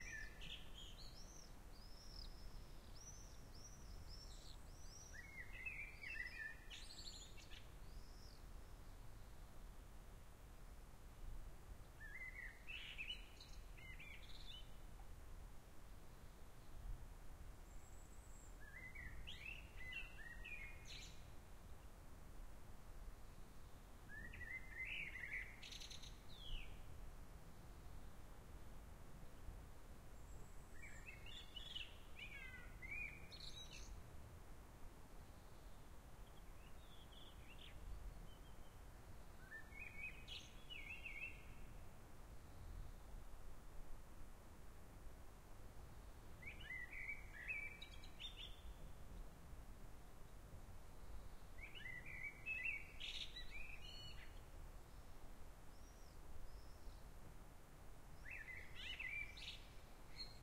Ibiza Sant Mateu forest birds
I recorded this sound with zoom h1 in 2015 spring, Ibiza, Sant Mateu.
Cheers!
Vytautas Vaicaitis
Birds, Environment, Field-recording, Forest, HRTF, Meditation, Nature, Peaceful, Sea, Wind